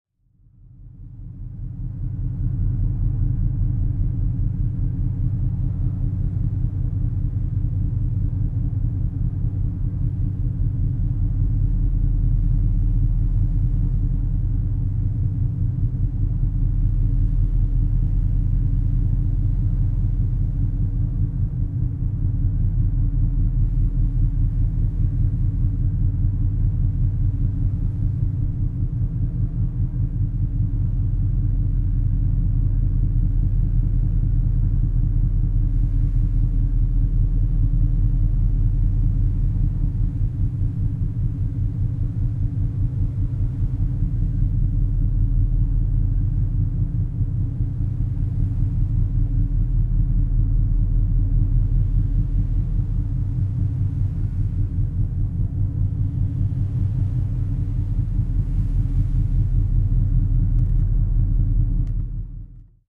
Inside Passage 3
Recorded on the BC Ferry - Inside Passage Route August 2017 using an Zoom H4n. The audio sounds unbalanced. ocean, waves, wind, drone, field-recording
drone, field-recording, waves